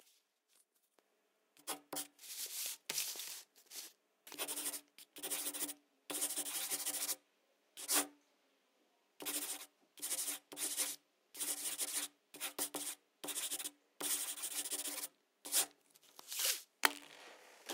writing pencil 2
writing with a pencil in a fast way. some paper moving. Recorded with Rode NT1000
writing, paper, drawing, swirl, pencil